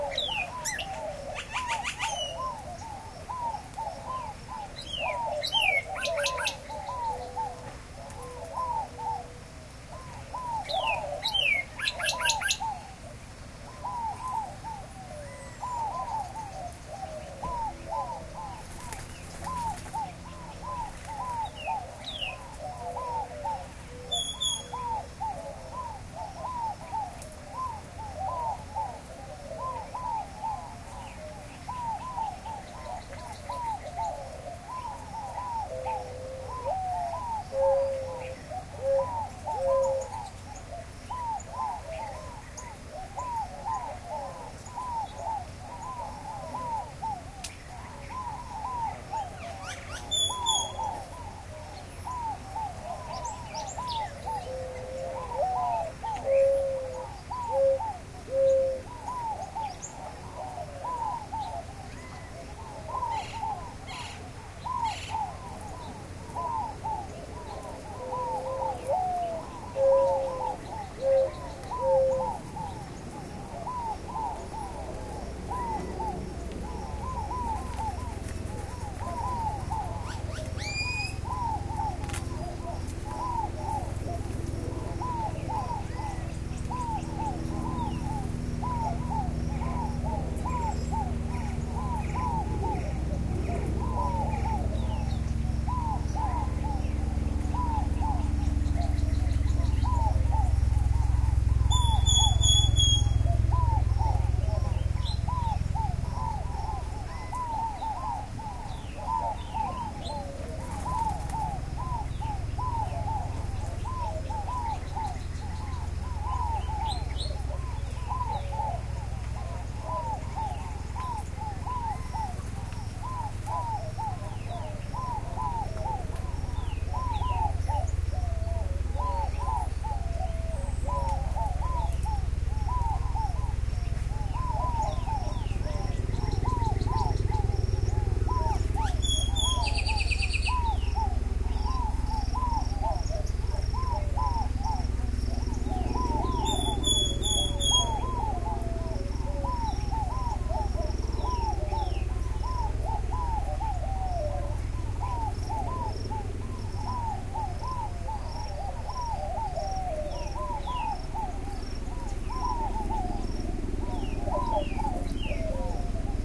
desert aviary02
Recorded at the Arizona Sonora Desert Museum. This is at the opposite end of the Desert Aviary and there is no stream in this recording. This could be used as a typical desert morning atmosphere. Birds in this recording: White-winged Dove, Mourning Dove, Inca Dove, Great-tailed Grackle, Northern Cardinal, Gambel's Quail and Gila Woodpecker.
field-recording, arizona, dove, aviary, cardinal, birds, desert, zoo, morning